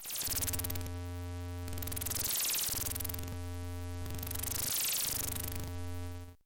Machine Switching Channels 02
Rustic old tuning or switching channels.
Thank you for the effort.